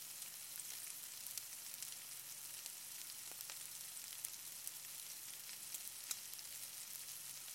Sizzling meat patties. LOOPABLE